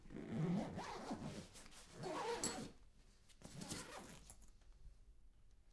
Closing Suitcase Zip
Zip, closing